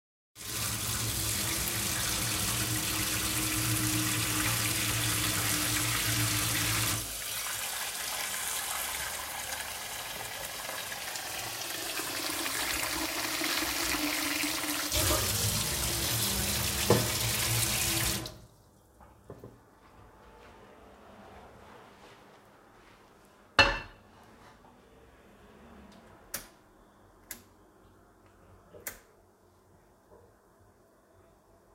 Preparing water for tea
Recording of ne filling a steel bowl in the kitchen.
Recorded using Rode fly mic connected to Samsung s9e.
filling, stove, sink, Tap, water, steel, Kitchen, bowl, running